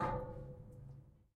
Plastic sewage tube hit 2
Plastic sewage tube hit
Plastic, sewage, tube